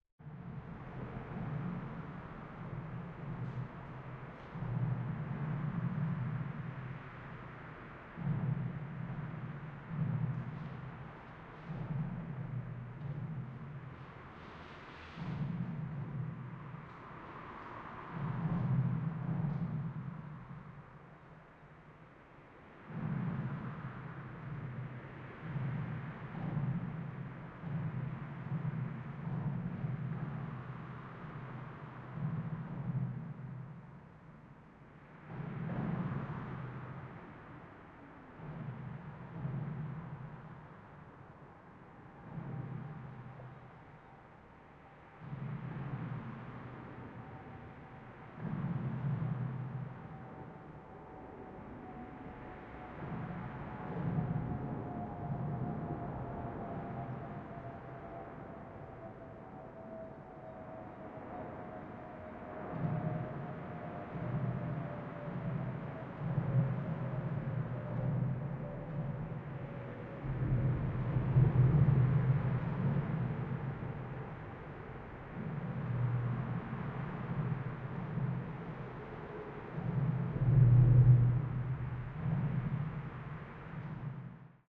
under the Ballensteadt bridge 050513
05.05.2013: sound captured under the Ballensteadt bridge in Poznan (Poland)
zoom h4n + vp88